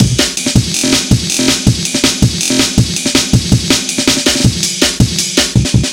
Glitch Break
A breakbeat with a Glitchy Roll 162bpm. programed using Reason 3.0 and Cut using Recycle 2.1.
beat, 162bpm, gltch, loop, jungle, break, dnb, overused, amen